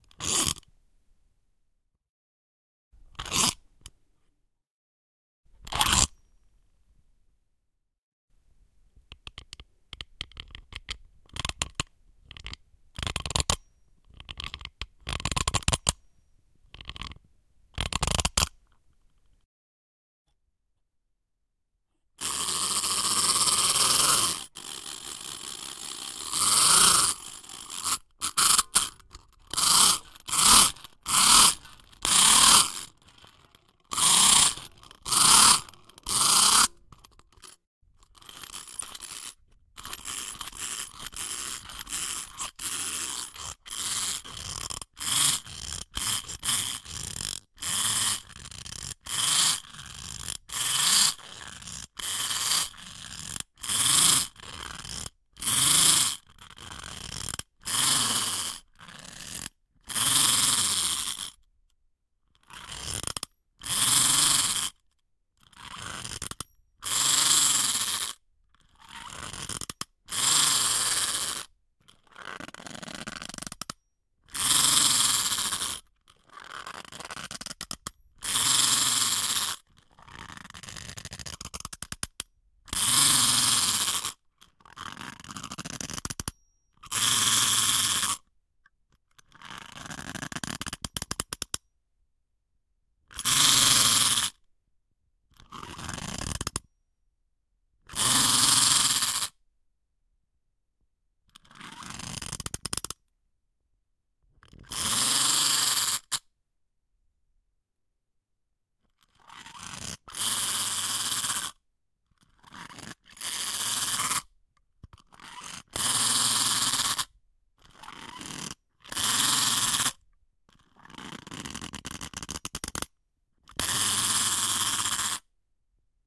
Tin Toys: ladybug stressed
This is the recording of little ladybug wind-up tin toy.
A little tin toy being forcely discharged.
Loud metallic sounds.
metal, metallic, spring, tin, toy